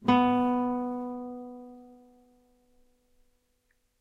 B open string
open B string on a nylon strung guitar.
open, classical, string, b, guitar, note, nylon, spanish